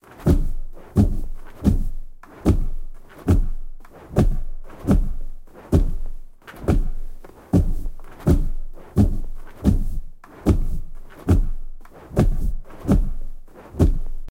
I couldn't find any good giant wings flapping here, so I made my own and am now sharing them so nobody else has to! Screw paying for sounds! Made from a combination of pizza box lids, sheets, and a bit of sound design.
And why? Who's gonna track down a foley artist from the credits of a show for a random sound? Just check out the Sonic Realms podcast if you like audiodramas and role playing games; there's nothing else like it. THAT'S something I would appreciate. But only if you feel like it.
Enjoy and use the hell outta the totally free sound!
big, bird, dinosaur, dragon, enormous, flap, flapping, flight, fly, flying, Giant, huge, massive, monster, roc, soar, soaring, take-off, wing, wings